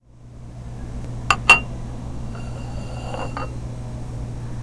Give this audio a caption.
The sound of putting a plate down on the table and sliding it at the CoHo, a cafe at Stanford University.